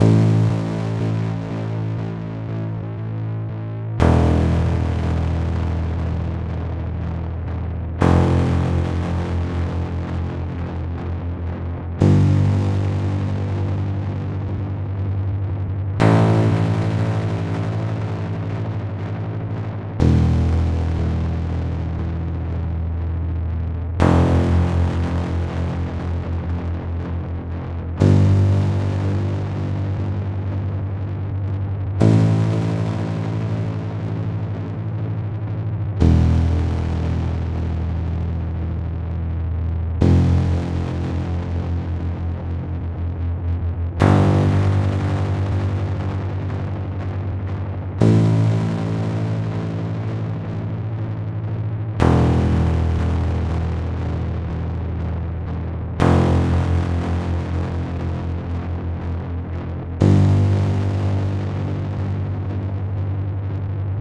bass loop 8400102 120bpm
bass 120bpm loop